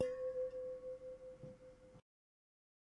Megabottle - 05 - Audio - Audio 05
Various hits of a stainless steel drinking bottle half filled with water, some clumsier than others.
hit, bottle, ring, ting